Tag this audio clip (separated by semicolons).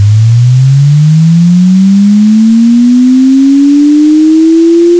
formula; mathematic